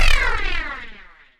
A laser zapping type sound.
laser, shoot, zap